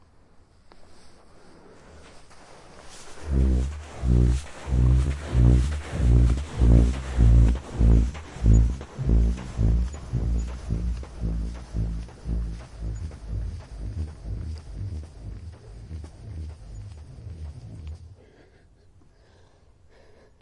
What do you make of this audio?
KELSOT8 nice dune climbing squeaks
Burping sound created when walking up Kelso Dunes.
sand, boom, musical, mojave-desert, singing, field-recording, burping, usa, kelso-dunes, california, dunes